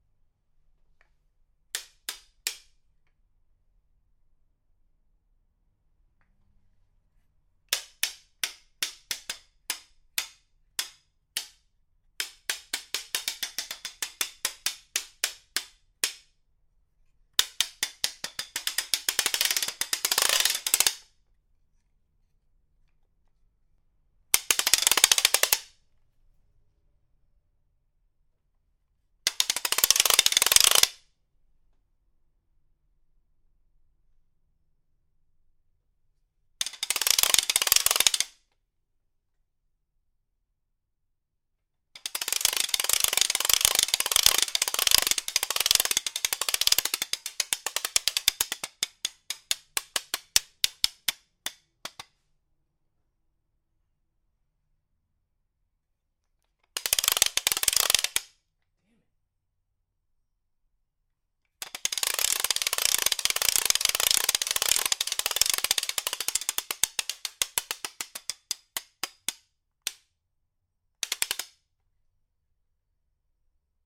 An early 1900's ratcheting noisemaker - huge thing, about a foot long! Being played here in bursts of varying lengths. Recorded with a Neumann TL103 through a MOTU 828MkII.
1920s, toy, 1930s, ratchet, clack, click